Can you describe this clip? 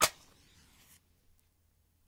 Match Strike 01
Striking of a match. Recorded using a Sennheiser 416 and Sound Devices 552.
burn, burning, candle, cigarette, fire, flame, ignition, light, lighter, lighting, match, matchbox, smoke, strike, striking